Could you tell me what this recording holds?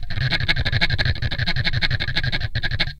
ape.rubba.26
instrument, daxophone, wood, friction, idiophone